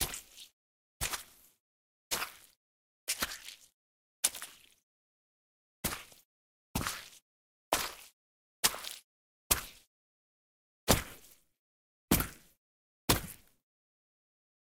Footsteps Mountain Boots Mud Mono
Footsteps sequence on Mud - Mountain Boots - Walk (x5) // Run (x5) // Land (x3).
Gear : Tascam DR-05
moutainboots, dr05, foot, recording, boots, field, mud, landing, step, feet, tascam, walking, run, footstep, running, mountain, walk, foley, steps, land, footsteps